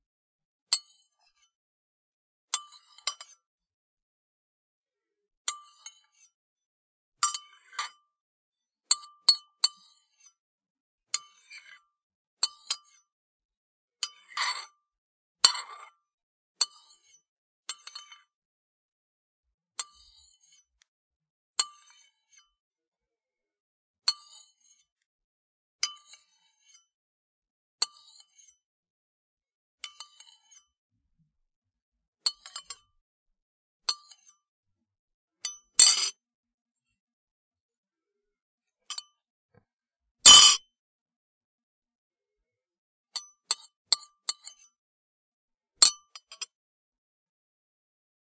bowl and spoon
Sounds of a metal spoon on a ceramic bowl. Tried some different speeds and movements. Clatters at the end.
ceramic, clatter, kitchen, metal, spoon